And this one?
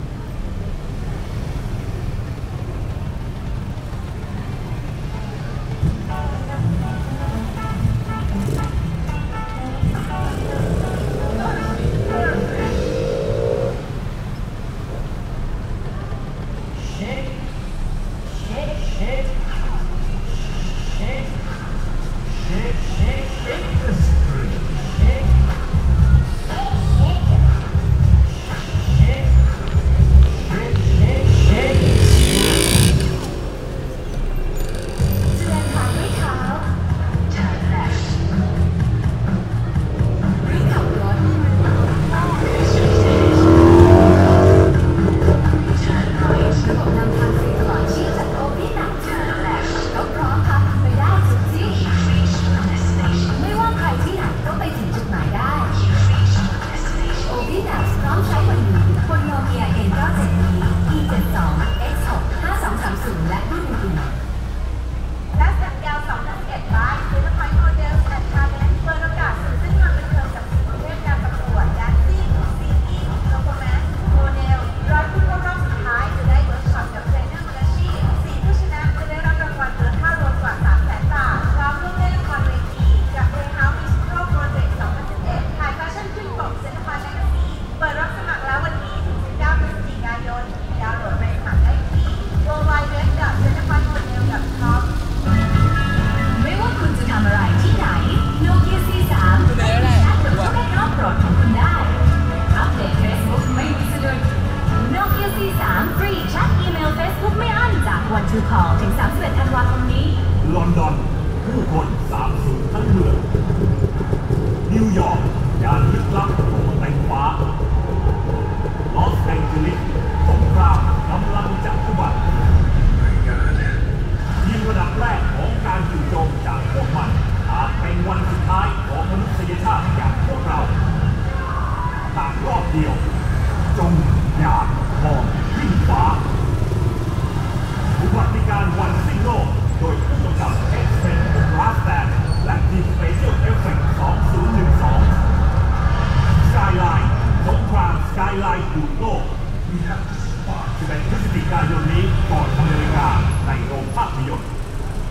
The ambiance recorded in Siam Square, Bangkok, Thailand where teenagers go shopping. You can hear music and commercials from a large LCD TV near the center point of Siam Square.
Recorded with a cheap omni-directional condenser microphone.
shopping, bangkok, teenager, crowd